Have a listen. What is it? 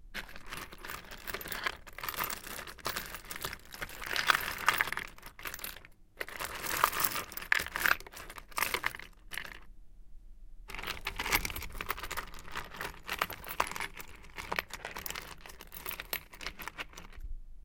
Metal sound 3 (mutters and screws)
A big box of mutters and screws being rustled around. The sound is quite pleasant if I may say so myself.
soundfx, tools, screws